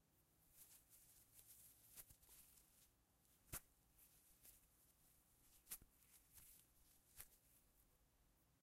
Tying rope
Hastily tying a small rope into a knot a few times.
knot, rope, tie, tying